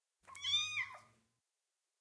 sound of Kitty